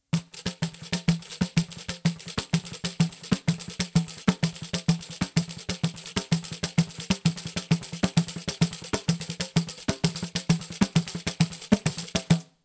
Playing a samba rhythm on different brazilian hand drums, so-called “pandeiros”, in my living room. Marantz PMD 571, Vivanco EM35.
brazil, drum, groove, pandeiro, pattern, percussion, rhythm, samba